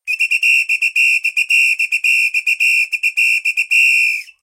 Different rhythmic patterns made by a samba whistle. Vivanco EM35, Marantz PMD 671, low frequences filtered.